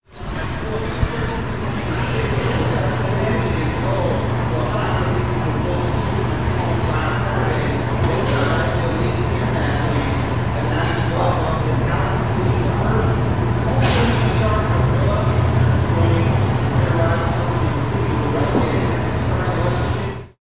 This is a sound recorded during July, 2011 in Portland Oregon.